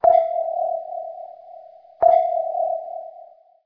Sonar pings
This is a 625Hz active sonar ping, the type used for long range low resolution seafloor imaging or anti sumbarine
uses. NOTE: This is an actual sonar ping, but of a type very rarely
used today. Most active sonar, especially anti submarine sonar, is
14kHz and up making it very difficult to hear
sonar, submarine, ping